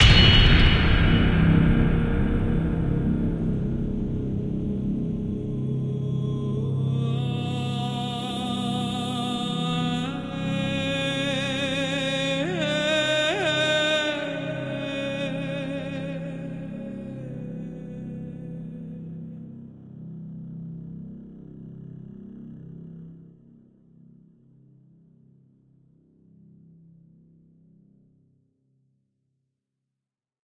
Voice of end 1
Sweet voice - Franchesca, NI Kontakt Sampler. Enjoy, my best friends!